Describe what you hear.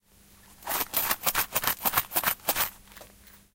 mySound WB Aaron
aaron, belgium, cityrings, wispelberg